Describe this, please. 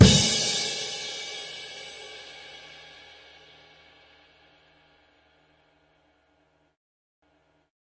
01 Crash Loud Cymbals & Snares
bubinga, click, crash, custom, cymbal, cymbals, drum, drumset, hi-hat, metronome, one, one-shot, ride, shot, snare, sticks, turkish, wenge